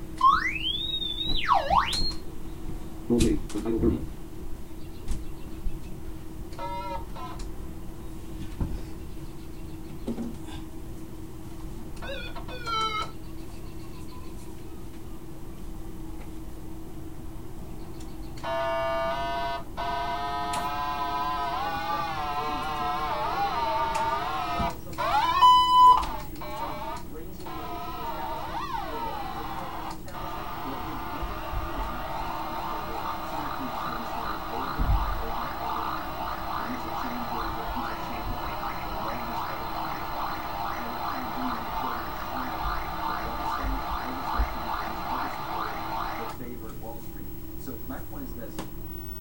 Colorino light probe, via mic, old Sanyo TV
Pointing the Colorino at the sunny window for a second or two, you also hear the battery low beep, then moving it around in front of the old Sanyo color TV with CRT screen. The TV is too far away for my line-in cord to reach, so I just mic'd it with the Lifecam HD3000 from across the room. You can hear TV audio. You can also hear NVDA with Eloquence formant synthesizer talking about Goldwave, saying the current file that's recording, untitled 30.
The Colorino Talking Color Identifier and Light Probe produces a tone when you hold down the light probe button. It's a pocket sized 2-in-1 unit, which is a Color Identifier/Light Detector for the blind and colorblind. The stronger the light source, the higher the pitch. The more light it receives, the higher the pitch. So you can vary the pitch by moving and turning it.
blind, electronic, fm, modulation, text-to-speech, video